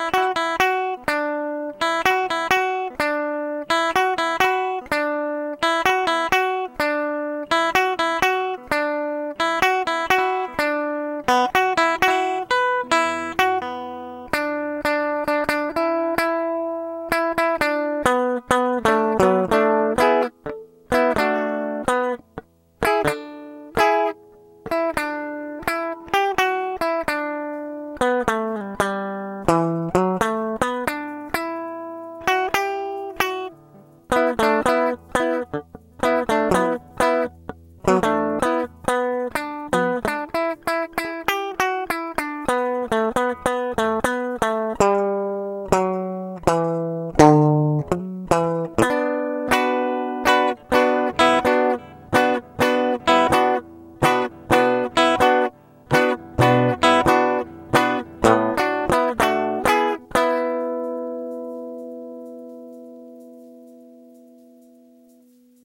My dad playing the guitar.
A while back, Yoshitoshi was having a remix contest for Sultan featuring Zara Taylor - "No Why", and for my submission I wanted to try adding a live interpretation/cover of the guitar loop.
So I asked my dad but he got way too carried away in all kinds of directions I didn't want to take.
But this might be useful to someone.
practice, session